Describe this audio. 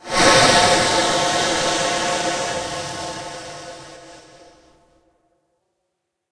Descontamination Chamber sound effect for general usses, enjoy :D